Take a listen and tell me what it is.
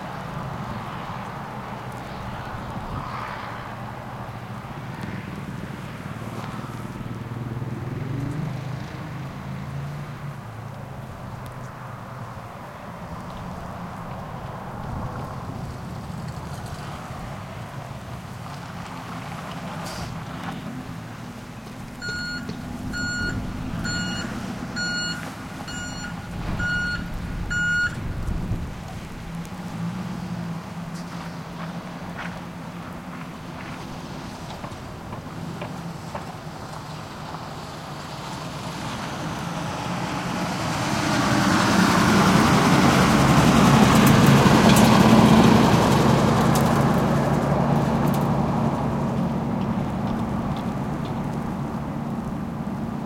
traffic village winter +truck backup beeps and pass by Quaqtaq, Nunavik
traffic, winter, village